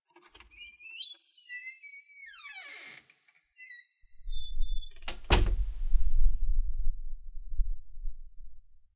A recording of my bedroom door closing.